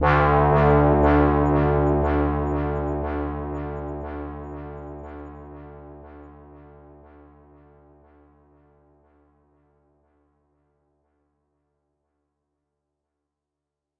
space hit
simple brassy hit with some delay